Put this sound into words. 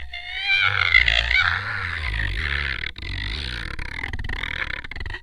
scree.flop.09
idiophone, friction, wood, instrument, daxophone